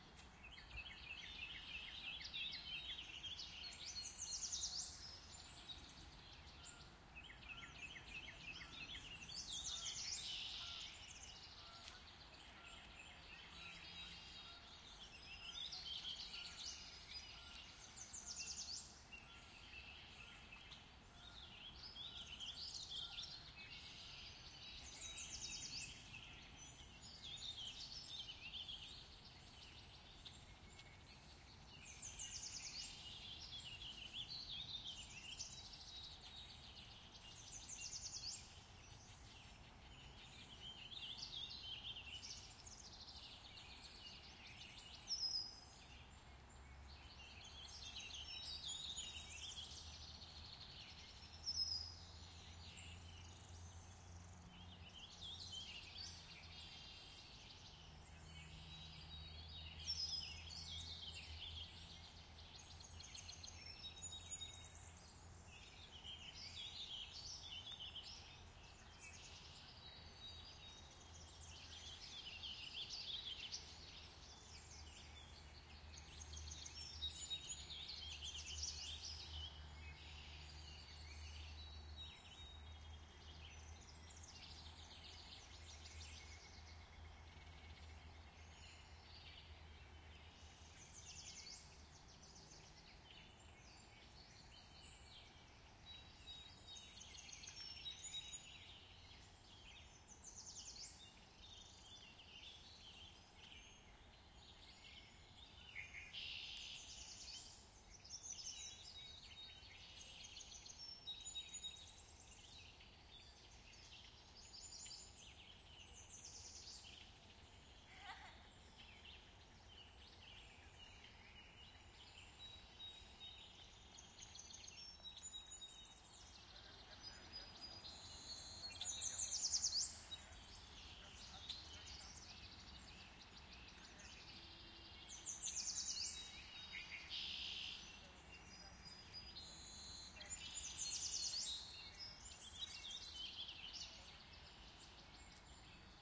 A warbler, a sparrow and some geese can be heard. In this version of this recording the background noise has been removed with a low-cut filter, and the recording has been turned into a loop. Recorded in New Jersey.
Primo EM172 capsules > Zoom H1 Recorder > Low-Cut Filter